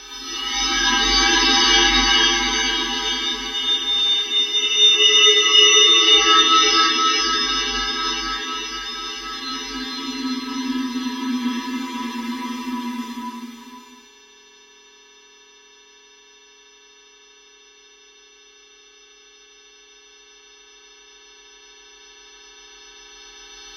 Rewind Block Swap 02
synthetic-atmospheres, ethereal